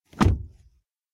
cerrando puerta de carro / closing car door
cerrando puerta de camioneta Ford Ranger 2020
grabado con Xiaomi redmi 6 y Rec Forge II
closing door of Ford Ranger 2020 truck
recorded with Xiaomi redmi 6 and Rec Forge